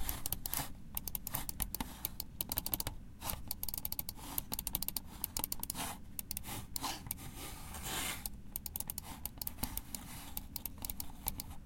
Computer Mouse Fast
An Apple Computer Mouse Click
computer, keyboard, mouse, typing